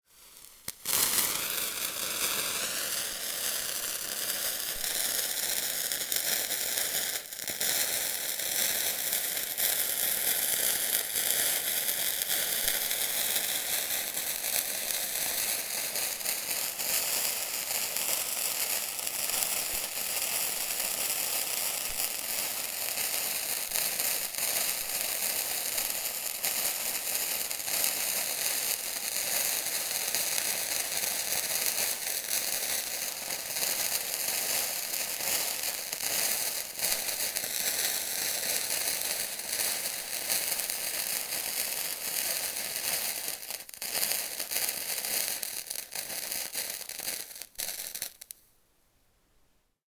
bengal flame burning
A record of a burning bengal candle.
Made with Oktava-102 microphone and Behringer UB1202 mixer.